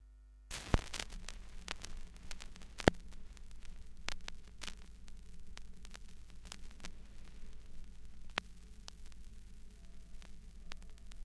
vinyl intro noise
the intro noise of a vinyl
scratch, unprocessed